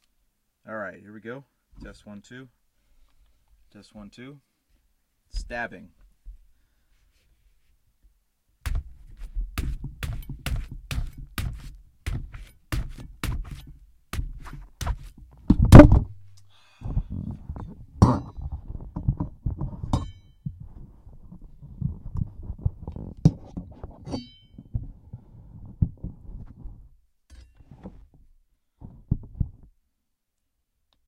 melon-stab-take1
Sounds of a knife stabbing a melon that creates the audio fx of a person being stabbed or attacked with a knife.
knives, stab, sound-effect, fx